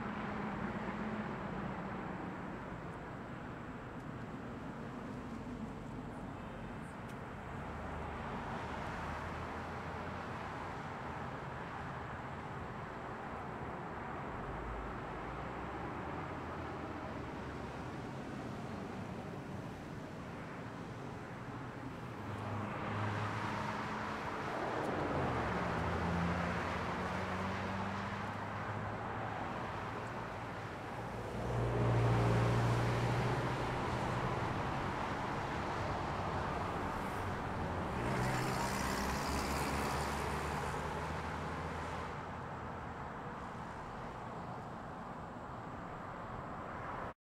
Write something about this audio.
Traffic Intersection Light 1
Light traffic at a small intersection in Knoxville, TN, USA.
ambience, car, cars, city, field-recording, intersection, road, street, suburban, traffic, truck, urban